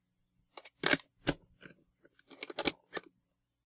Closing Lock
locking up a lock.
Recorded with a Samson M10 Microphone through a MobilePre USB Preamp|audio interface, by M-AUDIO.
closing, lock